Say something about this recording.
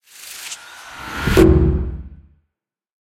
SFX Thrilling Build-Up and Hit 1 (Made at Paradise AIR)
I recorded a lot of sounds in the area, and edited them into a series of thrilling sound effects.
film, intense, thrill, buildup, filmic, thrilling, rising, increasing, hollywood, fear, dramatic, movie, build-up, tense, SFX, action, cinematic, suspense, appearing, tension, approaching, thriller, futuristic, drama, climax, climatic, crescendo, cinema, threatening